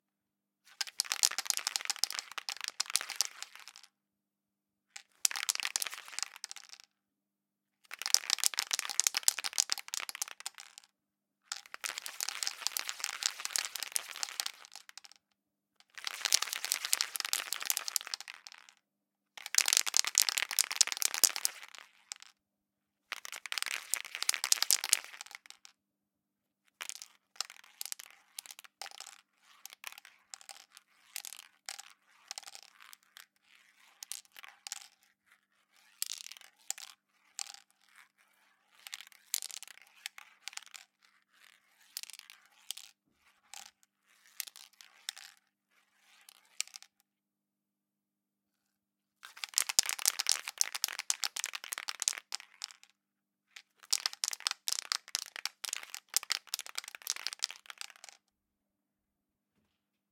Different sounds from a spraypaint, recorded close-up with a MKH8060